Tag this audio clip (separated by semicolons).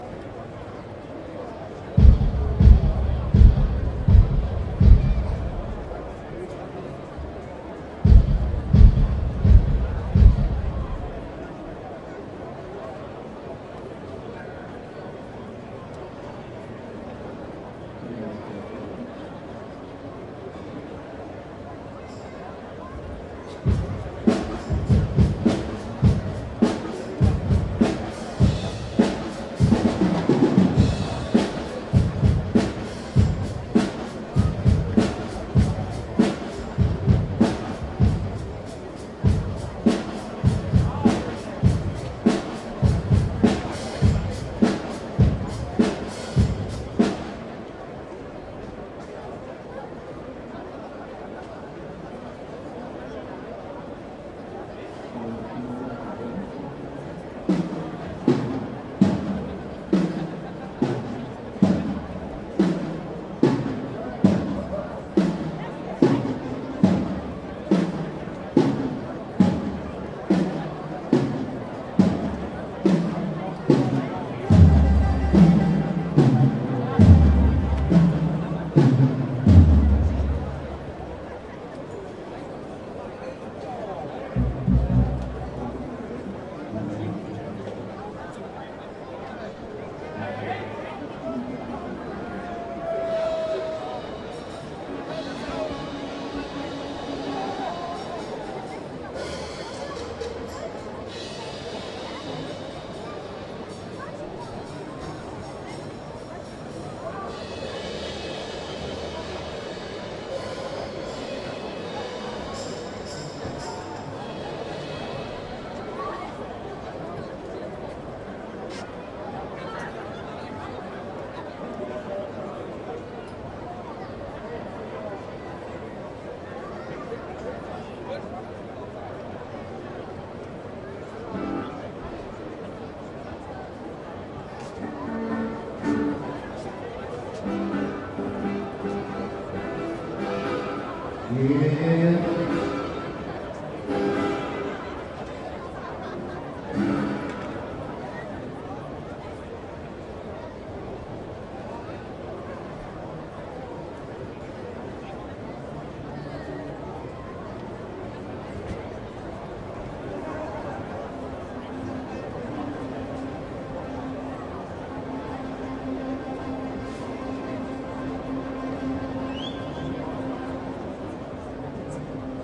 Freiberg; Germany; Saxony; evening; festival; loud; market; noise; party; people; public; square; stage; town; work